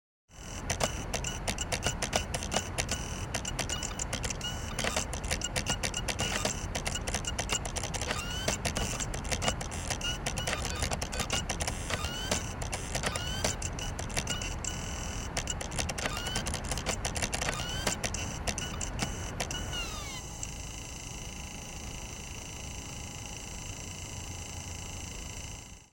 Mac iBook G3's cdrom working and producing various sound including some air noise from the spinning CD. Recorded very close to the cdrom with Rode NT1000 condensor microphone through TLAudio Fat2 tube preamp through RME Hammerfall DSP audio interface.
computer, fan, mac